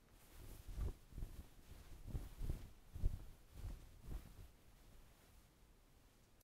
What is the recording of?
General cloth moves, can be used as clothes movement or cloth moves.
Cloth, Clothes, Movement